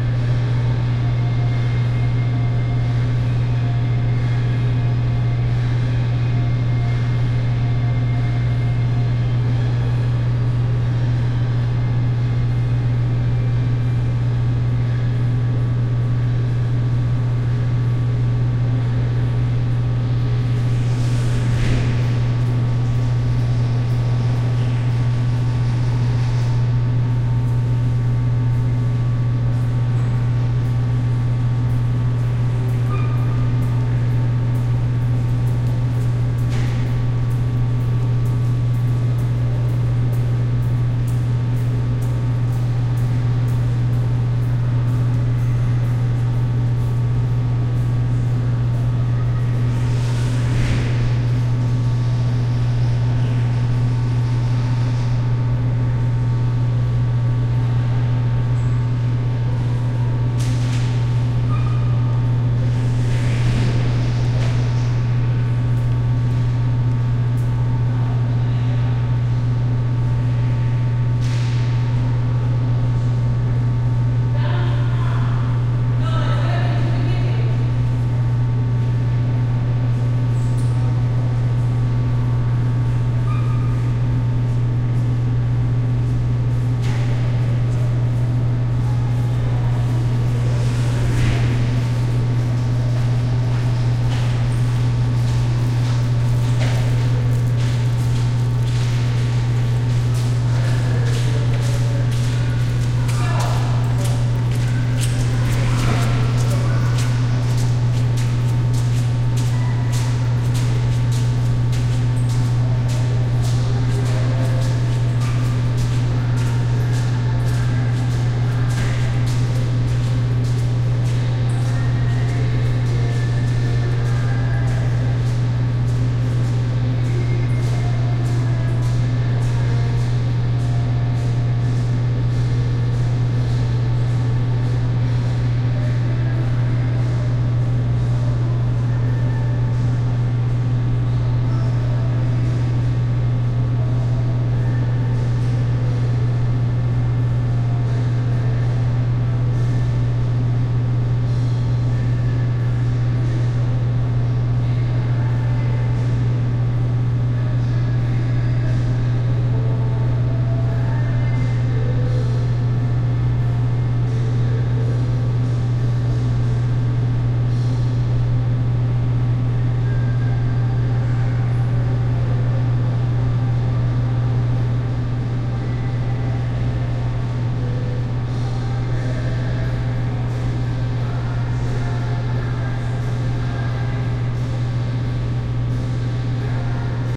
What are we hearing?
Mall Corridor, Loud Hum
Quiet area in the mall, loud hum.
tone, room, shopping, center, mall, store, echo, ambience